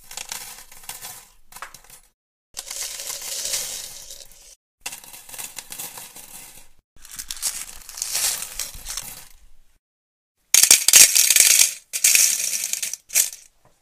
Dumping paper clips out on a desk
Five different takes! Hopefully one of them works for you!
office-supplies, desk, paperclips, paper-clips